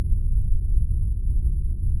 Level sound 1
Sounds from a small flash game that I made sounds for.
space, game